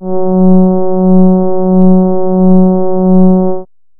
Warm Horn Fs3
An analog synth horn with a warm, friendly feel to it. This is the note F sharp in the 3rd octave. (Created with AudioSauna.)
brass, horn, synth, warm